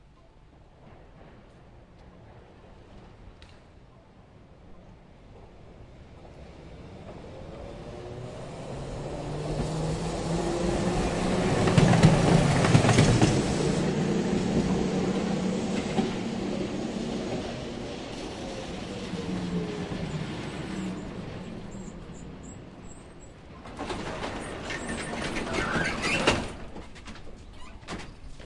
First tram starts from right and passes. Second tram drives from left and stops ahead.
Recorded 2012-09-26 12:20
city, departure, noise, Omsk, Russia, tram, vehicle